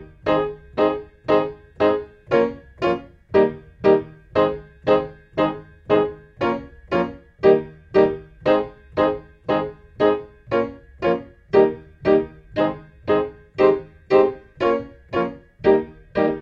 BC 117 Ab2 PIANO 1
DuB HiM Jungle onedrop rasta Rasta reggae Reggae roots Roots
DuB, HiM, Jungle, onedrop, rasta, reggae, roots